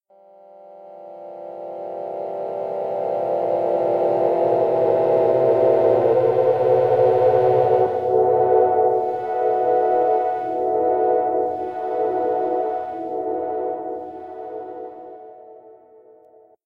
pulse pad
8 Pulse waves in different frequencies, useless sound experiment